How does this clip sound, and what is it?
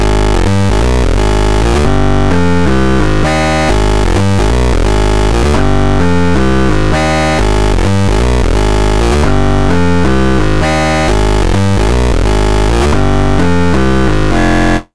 Electro Bass
Something I created quickly in Linux Multi Media Studio, it is my first ever upload (I'm trying to do my part).
I hope it can be used as part of something creative and cool!
In all honesty it is quite an annoying riff...sorry.